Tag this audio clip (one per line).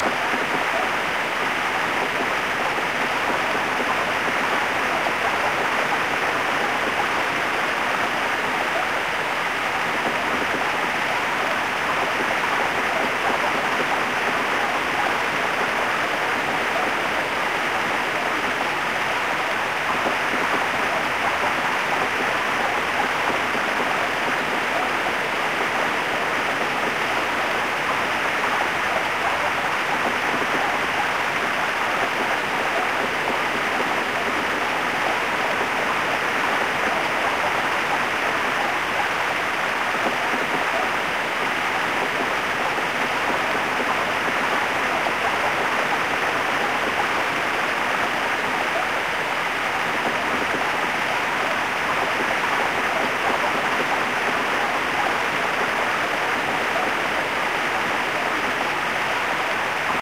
rushing
processed